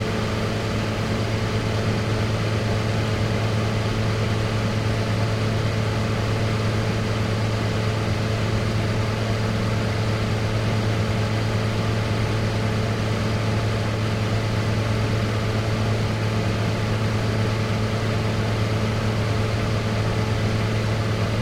Remote cooling unit - condenser.
Bar, Condenser, Cooling, Machine, Machinery, Pub, Remote, Unit